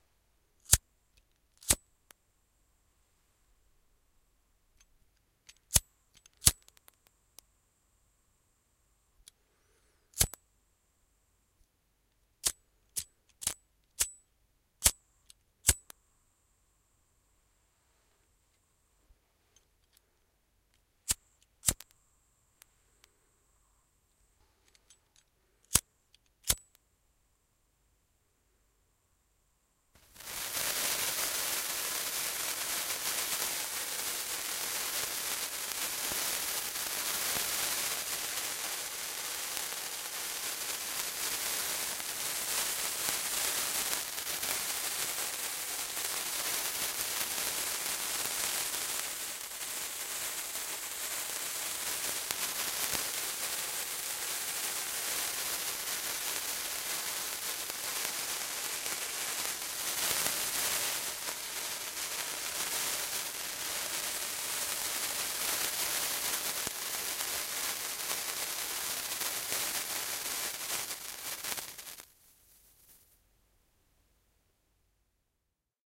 Cigarette Lighter + Sparkler
cigarette lighter & ignition of a sparkler,
the sparkler burns down;
(stereo)
burning, fire, lighter, sparkler